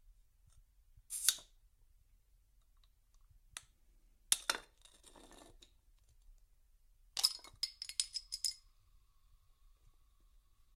Bottle Cap Pop
The sound of the cap being pried off of a beer bottle.
pop, cap, open, beer, bottle